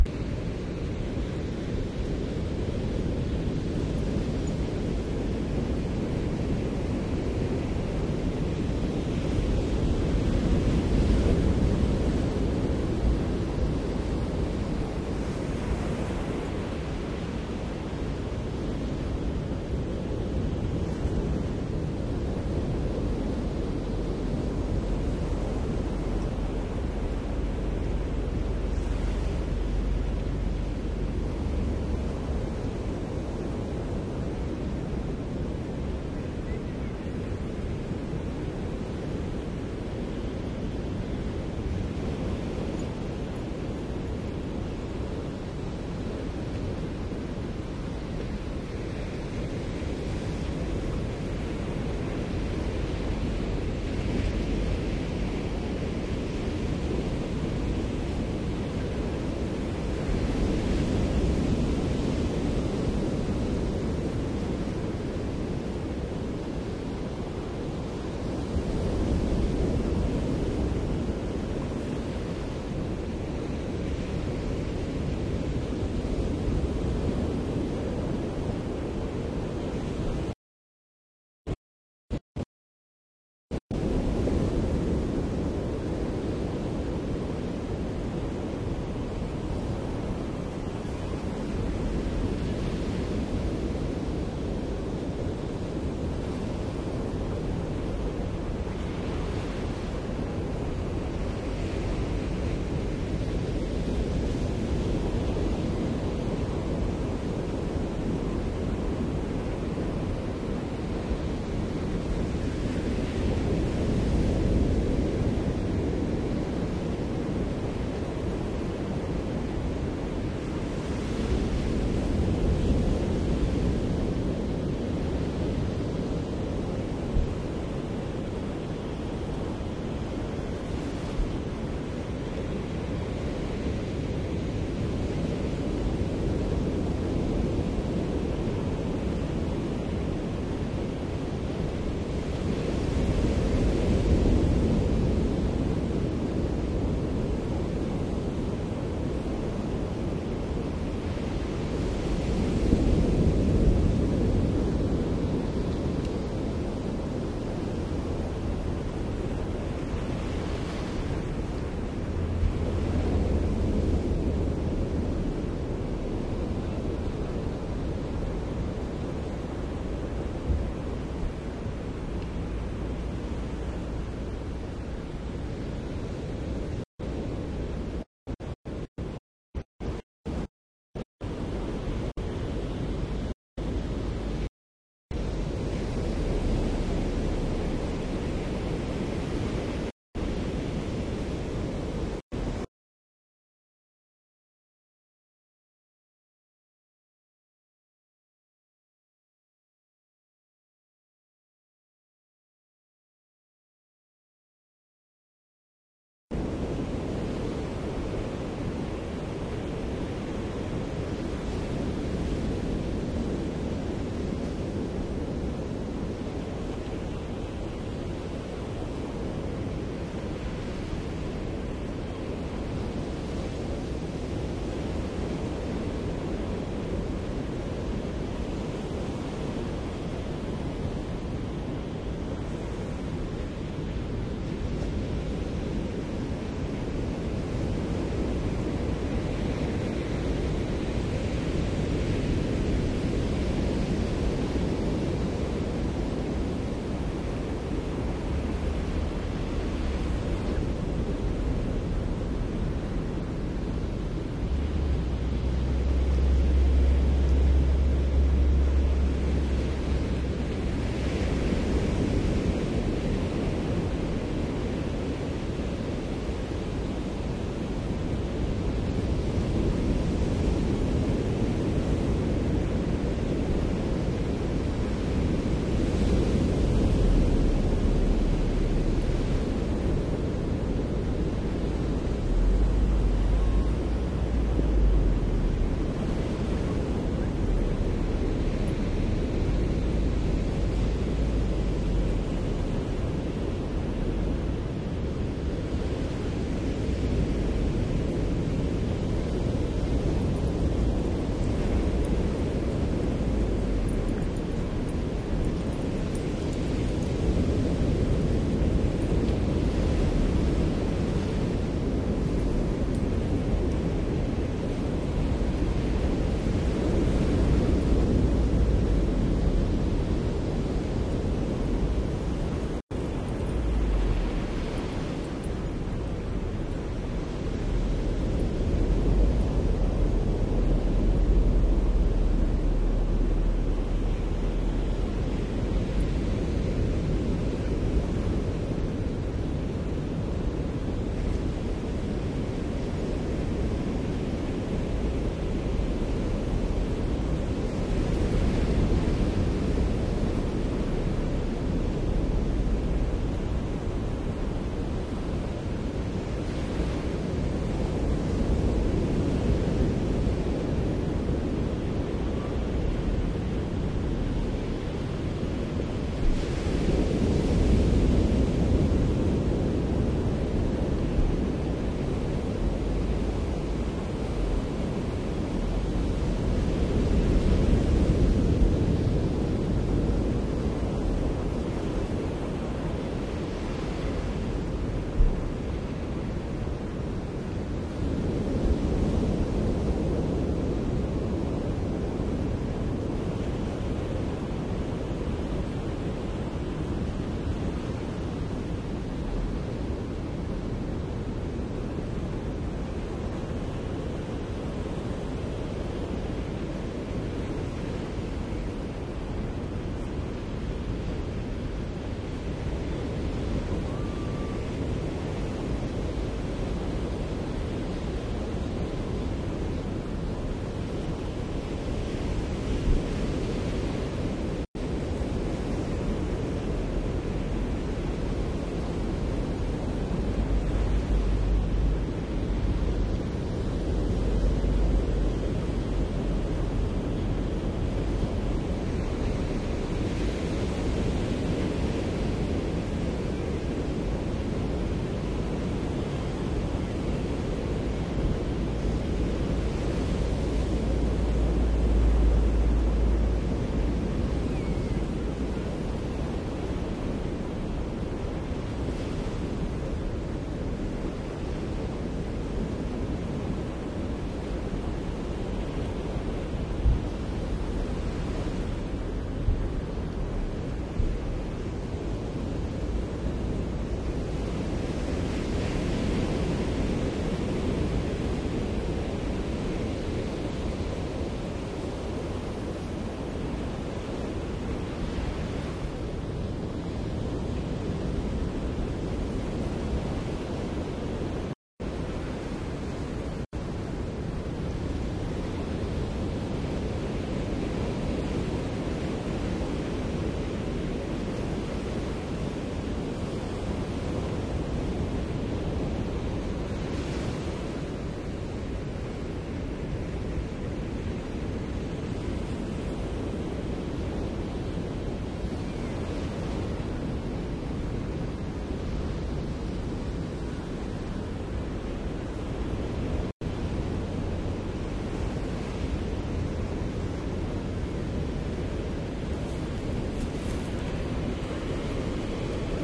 Beach North Berwick
North Berwick has one of the best town beaches in Scotland. To record this, I placed the Soundman OKM II on the beach and recorded all that with a Sharp Minidisk recorder.
oceansurf, north, northsea, field-recording, waves, berwick, scotland